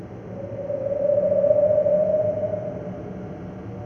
Wind whistle
Recorded me doing a small whistle and paul-stretching it and reverbing it.
Recorded and processed in Audacity
echo, effect, fx, horror, howl, quick, reverbed, sound, whistle, whistles